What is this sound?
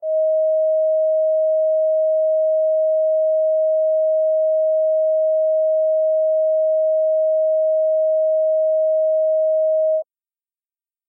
Pack of sound test signals that was
generated with Audacity